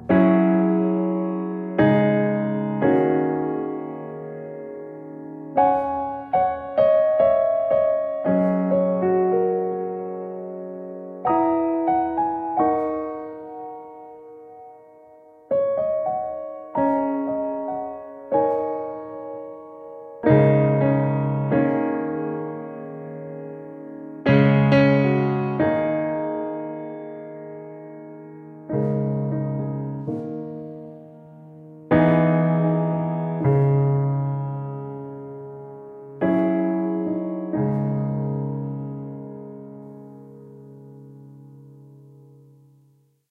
Piece of piano
piano, piece